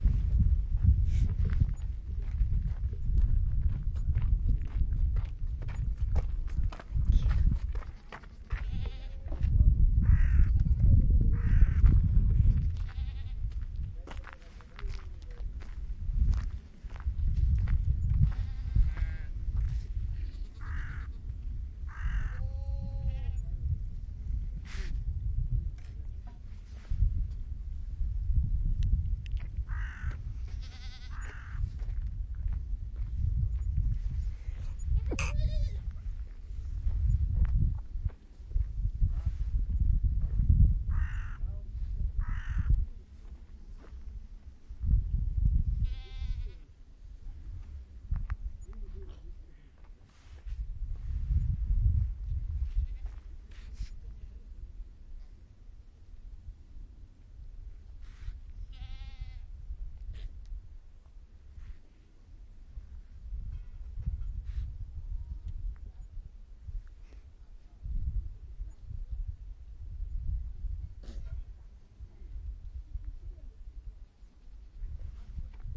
Some herd animals and a little wind on the Mongolia steppe